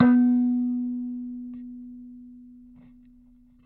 Tones from a small electric kalimba (thumb-piano) played with healthy distortion through a miniature amplifier.
amp, bleep, blip, contact-mic, electric, kalimba, mbira, piezo, thumb-piano, tone